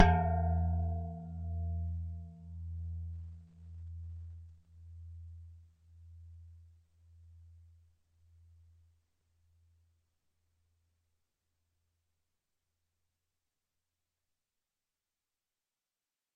Gong - percussion 12 02
Gong from a collection of various sized gongs
Studio Recording
Rode NT1000
AKG C1000s
Clock Audio C 009E-RF Boundary Microphone
Reaper DAW
ring, bell, drum, hit, ting, temple, percussion, chinese, clang, steel, gong, iron, metal, metallic, percussive